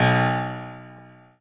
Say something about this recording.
Piano ff 015